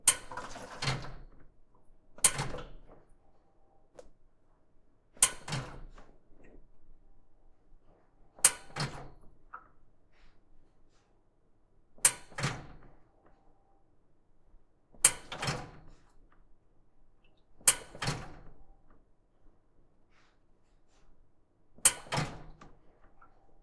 Button Click Switch Valve Water
The rear of a water fountain being activated and de-activated. Features the sound of a mechanical valve opening and closing
Button, Click, field-recording, Switch, Valve, Water